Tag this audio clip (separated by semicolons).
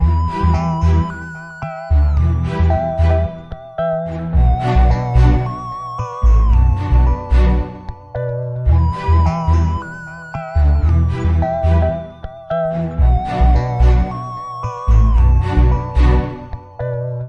111bpm; beat; electronic; groovy; intro; loop; loops; music; remix; rhythm; sample; strings; synthesizer; trailer